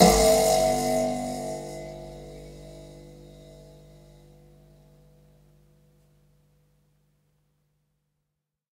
This sample pack contains eleven samples of the springs on an anglepoise desk lamp. I discovered quite by accident that the springs produced a most intriguing tone so off to the studio I went to see if they could be put to good use. The source was captured with two Josephson C42s, one aimed into the bell-shaped metal lampshade and the other one about 2cm from the spring, where I was plucking it with my fingernail. Preamp was NPNG directly into Pro Tools with final edits performed in Cool Edit Pro. There is some noise because of the extremely high gain required to accurately capture this source. What was even stranger was that I discovered my lamp is tuned almost perfectly to G! :-) Recorded at Pulsworks Audio Arts by Reid Andreae.